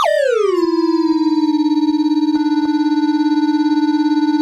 A Casio CZ-101, abused to produce interesting sounding sounds and noises
synthesizer, cz, glitch, alias, cosmo, crunchy, 12bit, casio, cz101, digital